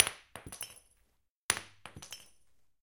Bouncing lightbulb 1
Dropping a small lightbulb, but apparently not high enough to break. So it chooses to bounce instead.
Recorded with:
Zoom H4n op 120° XY Stereo setup
Octava MK-012 ORTF Stereo setup
The recordings are in this order.